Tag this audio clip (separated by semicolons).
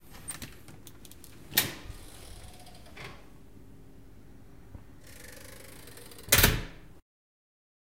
Close; Door; Metal; Open